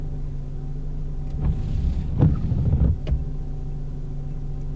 After the rain drops on my car recording, I carelessly turned on wipers while the windshield was wet without recording it. Then of course I couldn't just let it go and move on so I had to record a lame substitution, this is an almost completely dry windshield being violated by a dry rotted wiper blade.
car, field-recording